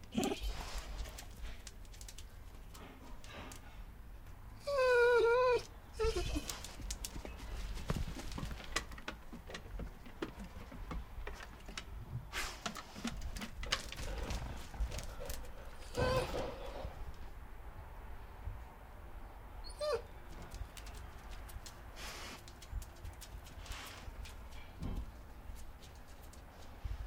dog whine 2
Dog whining in suburban backyard
whimper, whine, dog, animal